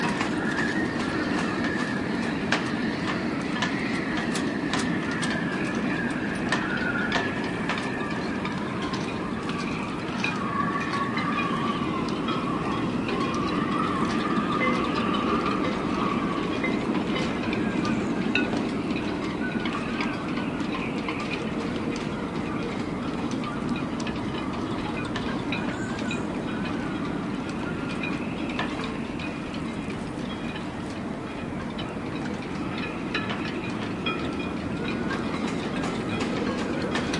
Marina in Kolding (Denmark) in wind